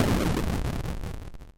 Simple retro video game sound effects created using the amazing, free ChipTone tool.
For this pack I selected the BOOM generator as a starting point.
I tried to stick to C as the root note. Well, maybe not so much in this one..
It's always nice to hear back from you.
What projects did you use these sounds for?